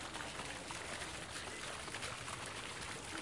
paisaje-sonoro-uem-agua
Paisaje sonoro del Campus de la Universidad Europea de Madrid.
European University of Madrid campus soundscape.
Sonido de agua
water sound
de, Europea, Madrid, sonoro, UEM, Universidad